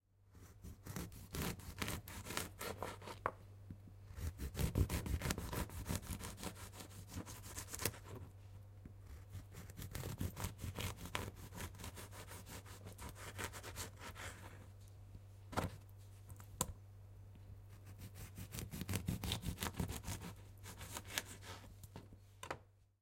14 Slicing lemon
CZ, Czech, Pansk, Panska